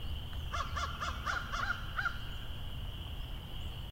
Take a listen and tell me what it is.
Creek/Marsh ambience throughout.